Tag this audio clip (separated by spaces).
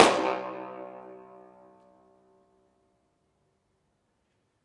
impulse-response reverb